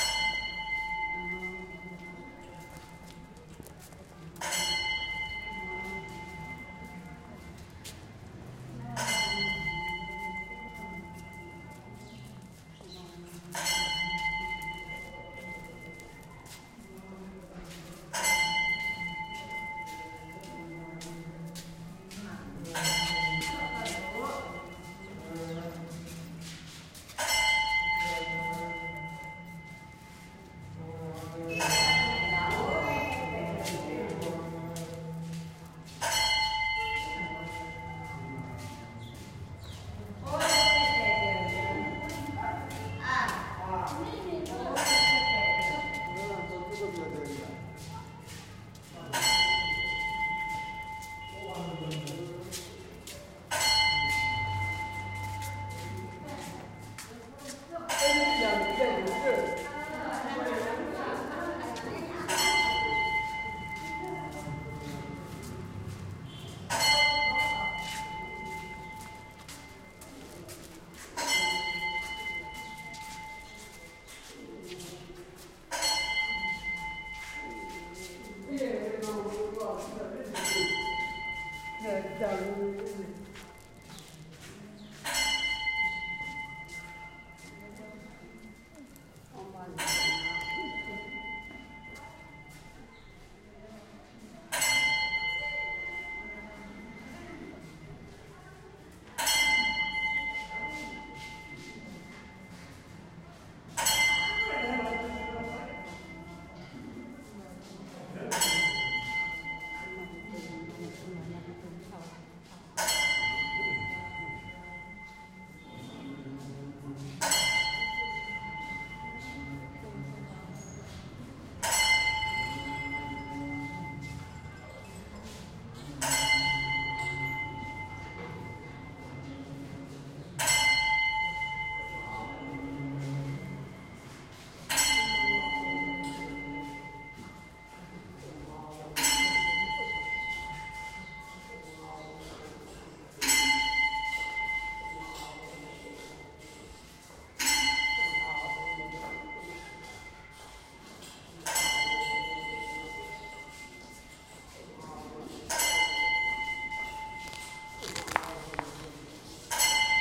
Large prayer wheel
February 2017
A prayer wheel, about 3 meters tall at the foot of Swayambhunath in Kathmandu.
bell, Buddhism, kathmandu, metallic, monastery, nepal, temple